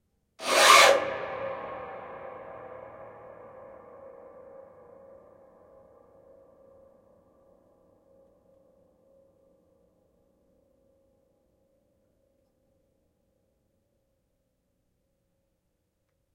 ANOTHER SHORT MID SCRAPE 2 track recording of an old bare piano soundboard manipulated in various ways. Recordings made with 2 mxl 990 mics, one close to the strings and another about 8 feet back. These are stereo recordings but one channel is the near mic and the other is the far mic so some phase and panning adjustment may be necessary to get the best results. An RME Fireface was fed from the direct outs of a DNR recording console.